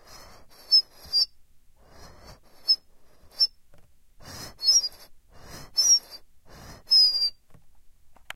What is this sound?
Dish soap whistle
Whistling noise produced by squeezing a half-filled plastic bottle of dish soap. Recorded using a Roland Edirol at the recording studio in CCRMA at Stanford University.
aip09, air, bottle, cap, soap, whistle